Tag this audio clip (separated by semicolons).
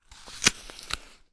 aip09; biting; complete; delicious; full; golden; hifi; tasty